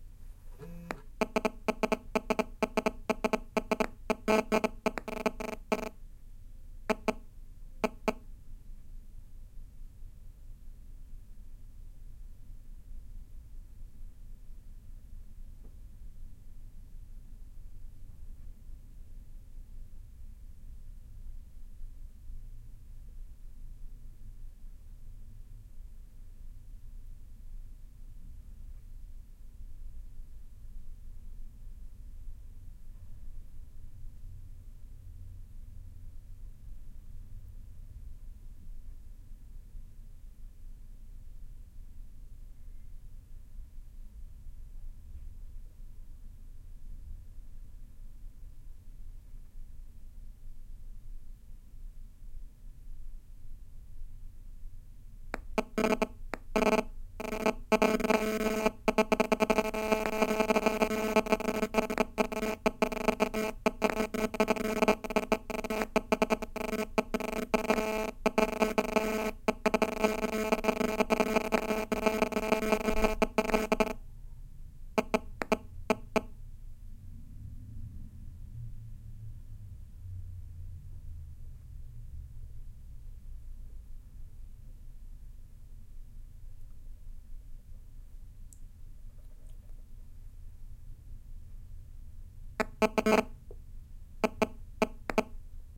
Iphone 4 cycling while attached to a dock (some kind of unshielded Sony alarm/personal stereo). Recorded with Edirol R-05. This is and excerpt from about a 6 minute period, starting from turning aeroplane mode off and editing out the end, where nothing happened. Some distortion. Unprocessed. Hotel room ambience.